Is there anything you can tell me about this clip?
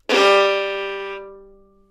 Violin - G3 - bad-attack
Part of the Good-sounds dataset of monophonic instrumental sounds.
instrument::violin
note::G
octave::3
midi note::43
good-sounds-id::3898
Intentionally played as an example of bad-attack
good-sounds, neumann-U87, single-note, violin